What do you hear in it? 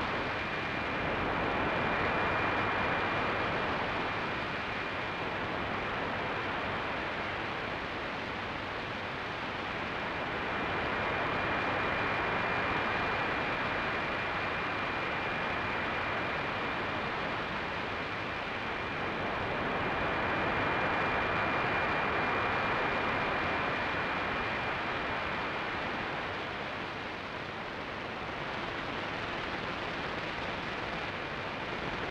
Radio Static Short Wave Noise 3
Some radio static, may be useful to someone, somewhere :) Recording chain Sangean ATS-808 - Edirol R09HR